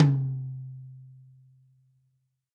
X-Act heavy metal drum kit==========================Drum kit: Tama RockstarSnare: Mapex mapleCymbals: ZildjianAll were recorded in studio with a Sennheiser e835 microphone plugged into a Roland Juno-G synthesizer. Cymbals need some 15kHz EQ increase because of the dynamic microphone's treble roll-off. Each of the Battery's cells can accept stacked multi-samples, and the kit can be played through an electronic drum kit through MIDI.